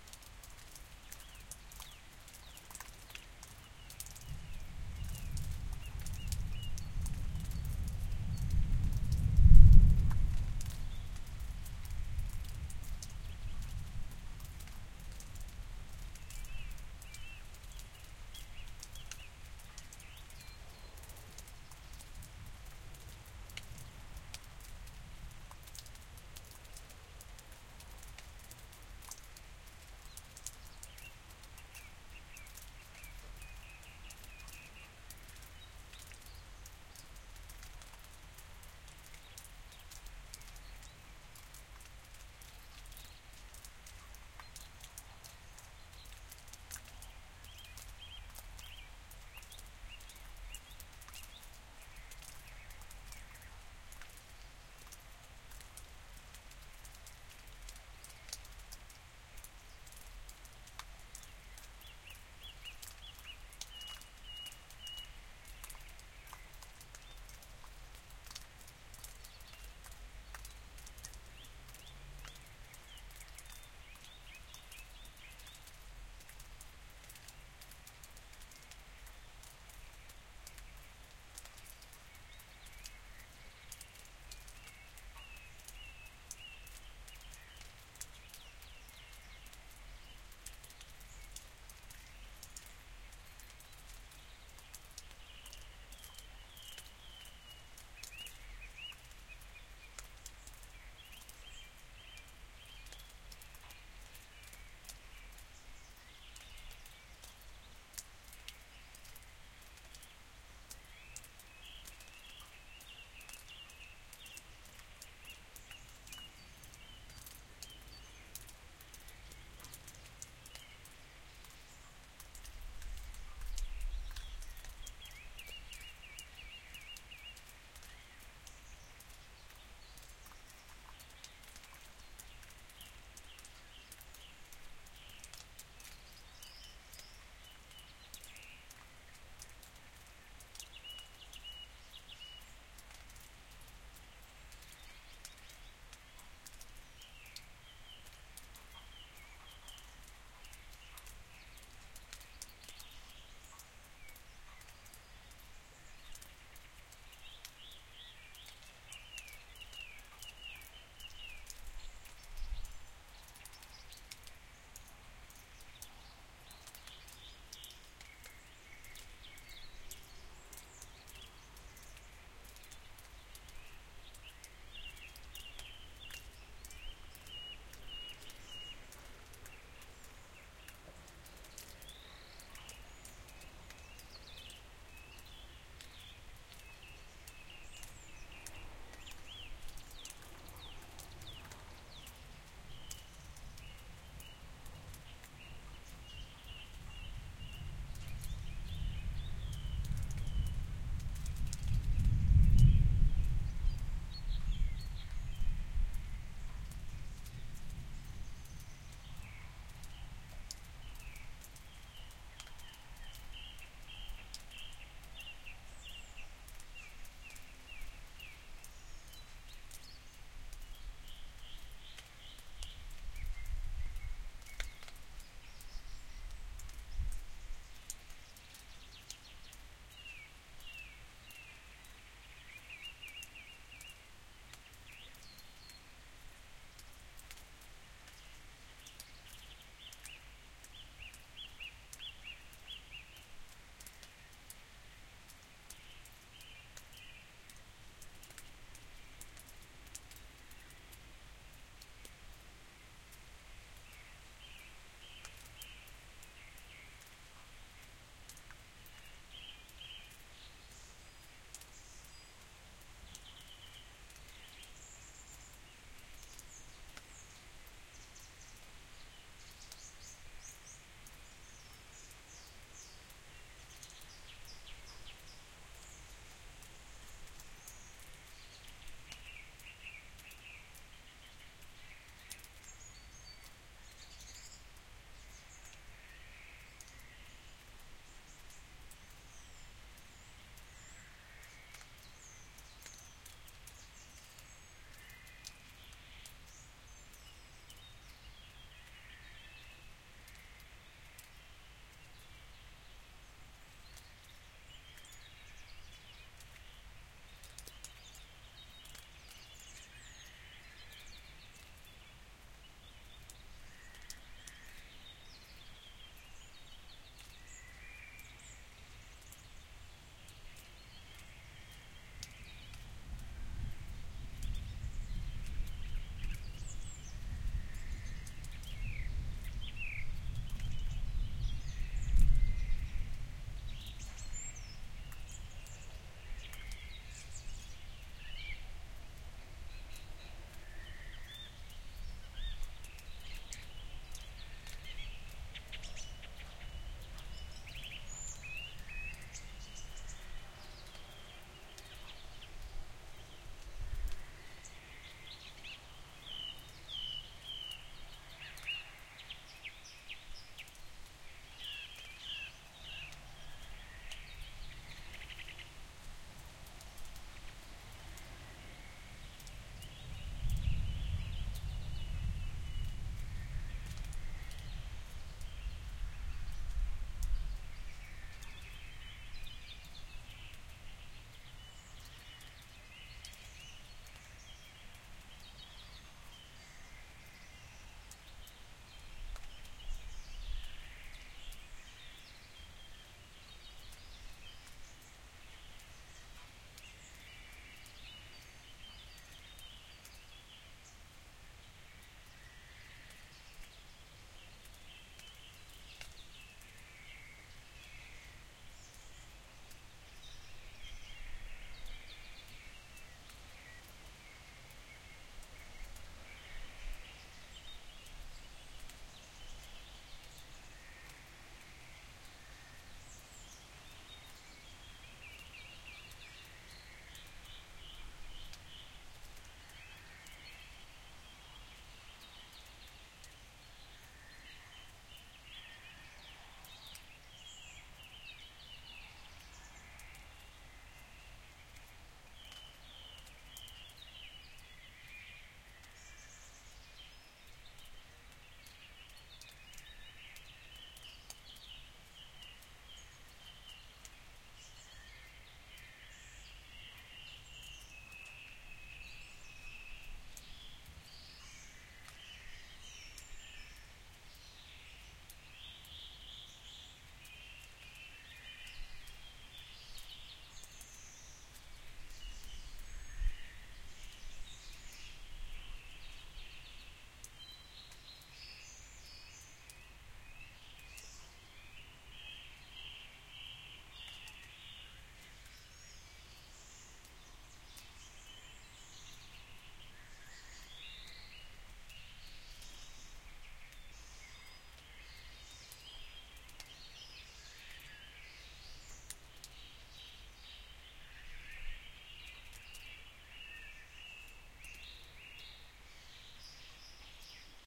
"after the rain" field recording, early in the morning on a rainy summer day in the Ardennes (Belgium). Recorded on a porch next to a meadow.
EM172 (spaced omni's)-> battery box-> PCM M10